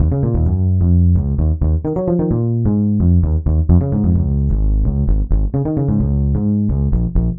130BPM
Ebm
16 beats